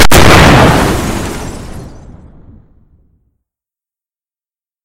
An explosion SFX with debris in the background. Created with Audacity.
Bomb, Debris, Explosion